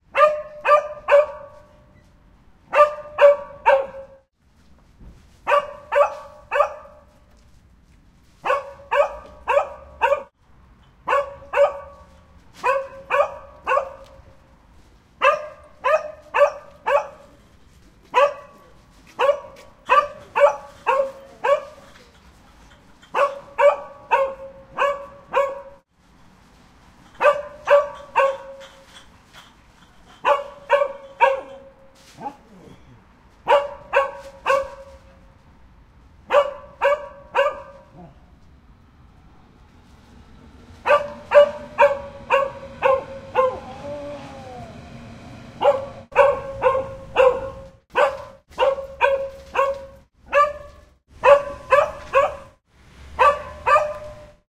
Barking Aldi Dog
Dog in front of the supermarket, being restless for their owner. Bark bark. Bark bark.
Recorded with a Zoom H2. Edited with Audacity.
animal, bark, barking, canine, dog, doggie, pet, puppy